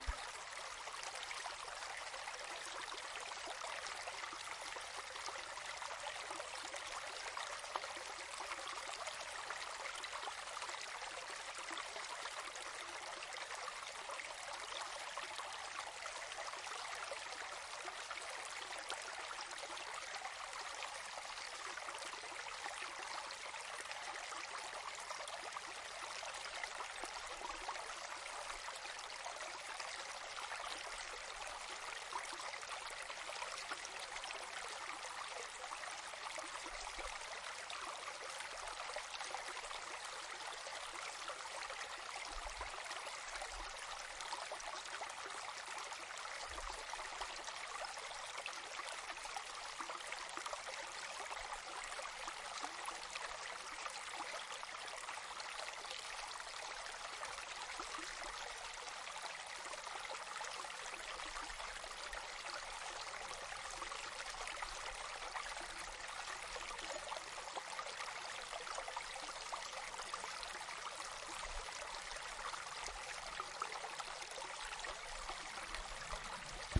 Creek in Forest
a little creek in a berlin forest
field, atmophere